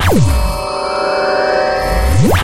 Jelly Beam 2
A laser beam sound with a nice turn on and turn off. Created for "Jellypocalypse"
beam, fi, jelly, laser, lazer, sci, shoot, tzzzz